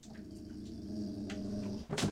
growl for gilded spikelore dragon
original-creator-Oneirophile, edited, growl